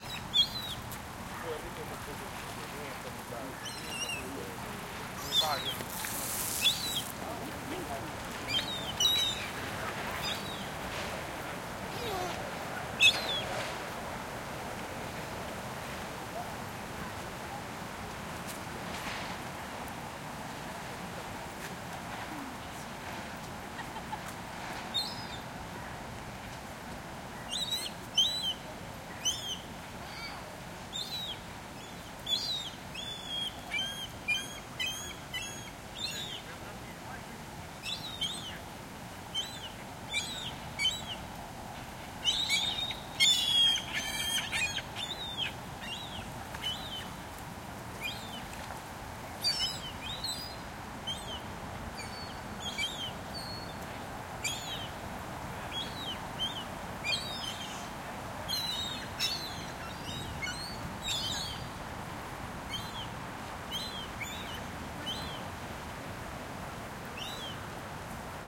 AMB CityPark Day Seagull People
Ambience in the park Lafontaine in Montreal during the day.
park-lafontaine, people, trafic